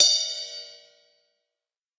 Drum kit sampled direct to my old 486DX no processing unless labeled. I forget the brand name of kit and what mic i used.